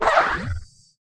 Creature Voice, A3, Dry
Raw audio of scraping a wet polystyrene bodyboard with my hands. Then processed and edited with a pitch shifter in Cubase to sound like a creature. Part of a sound library that creates vocalization sounds using only a bodyboard.
An example of how you might credit is by putting this in the description/credits:
The sound was recorded using a "H1 Zoom recorder" and edited in Cubase on 16th August 2017.
vocalisation, bodyboard, BB, Hidden, creature, monster, stretch, Voices, vocalization, surfboard